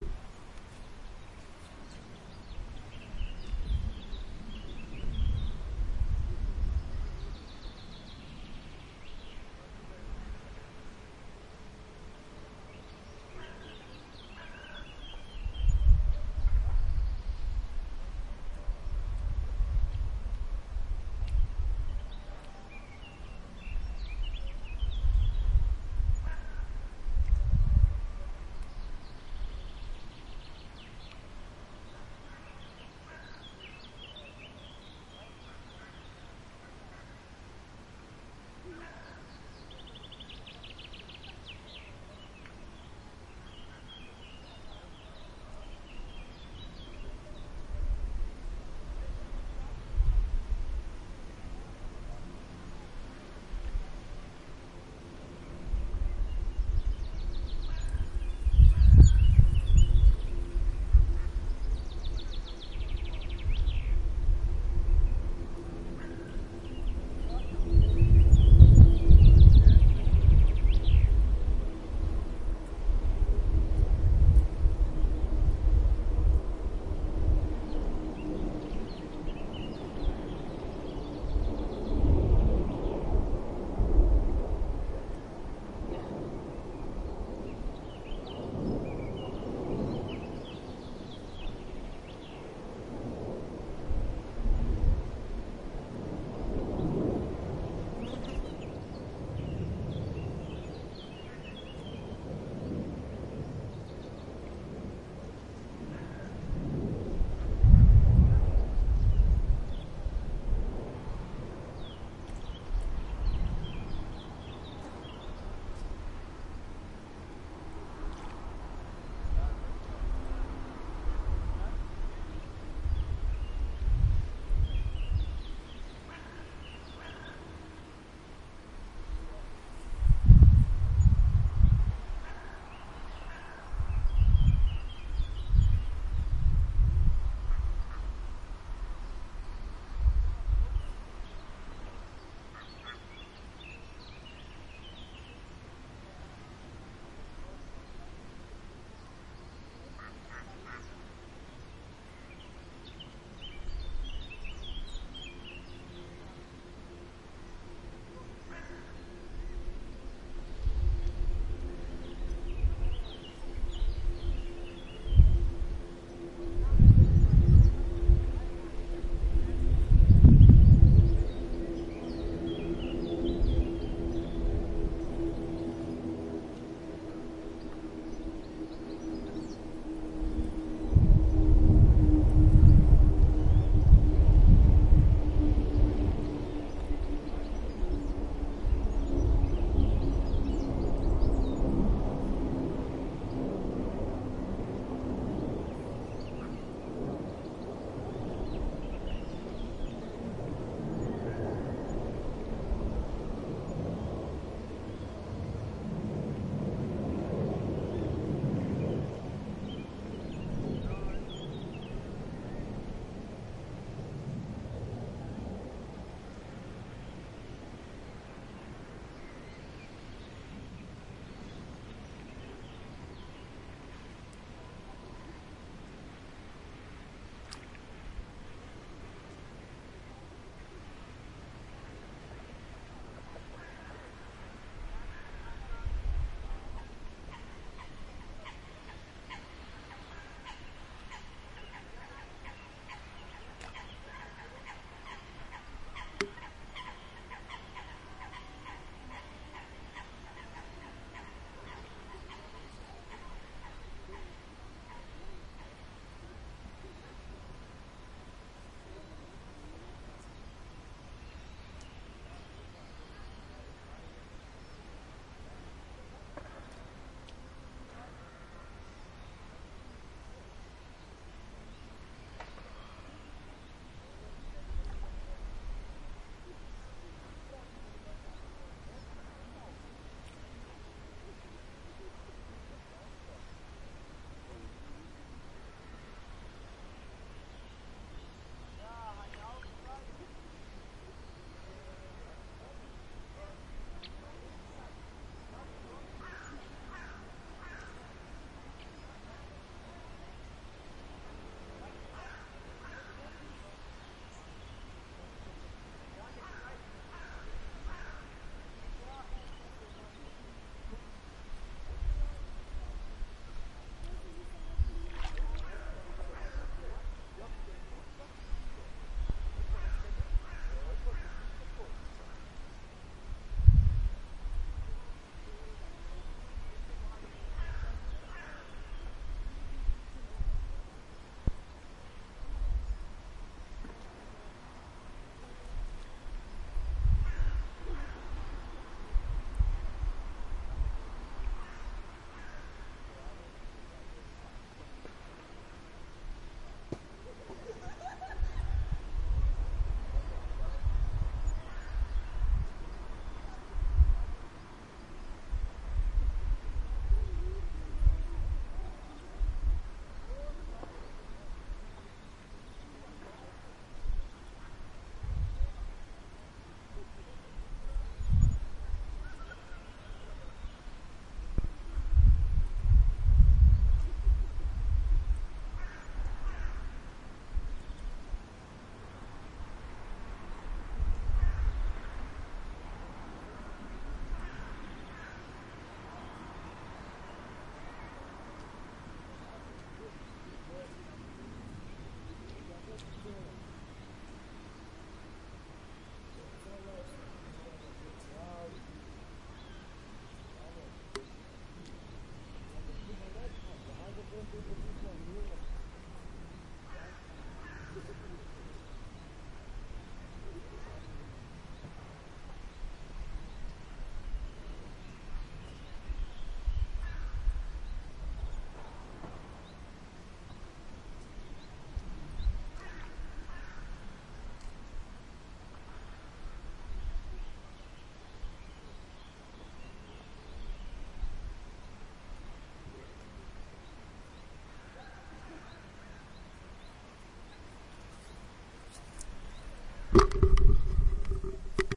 Wiler Weiher auf einem Stein
on a ston at a pond in switzerland